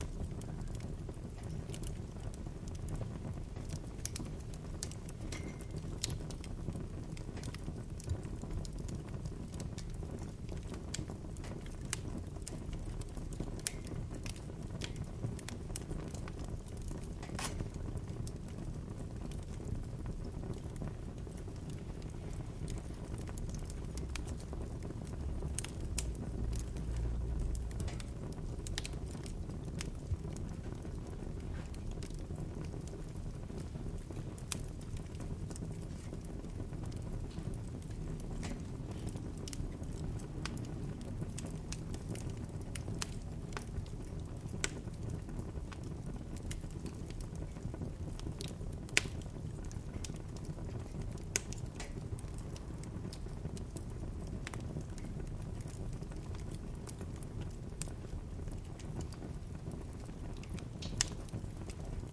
Recorded with a hyperdirectional SSH6 capsule - with the stereo mic open at a certain extent - (via the ZOOM H6) the fireplace's sound has an impressive presence and more space. The avenue noise can be heard as well from time to time.